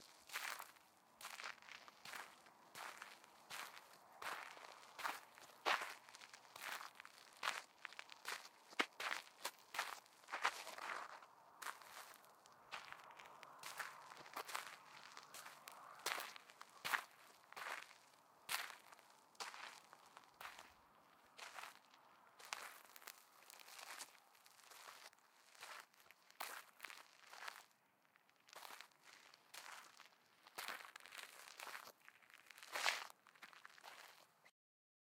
Footsteps walking on dirt/gravel. First addition to my Footsteps pack.